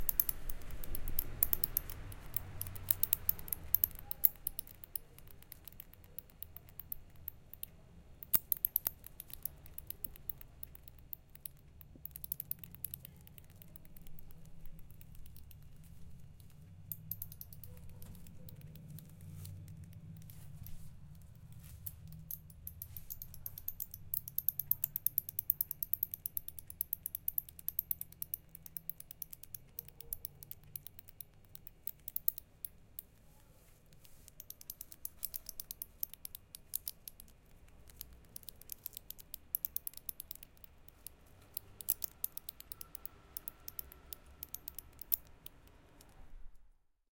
bat house
A bat trapped in my home for a while
bat, home, night